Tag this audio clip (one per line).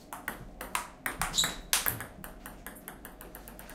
staff
ping-pong
sport
game
play
office